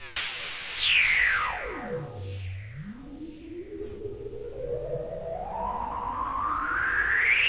NOISE JUNO106&SX700 Stereo
synthesizer (juno106) noise with effects